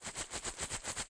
misc noise ambient